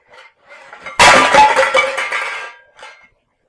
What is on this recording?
The sound of cans crashing. Suitable for those 'sneak quietly though enemy territory' scenes ;)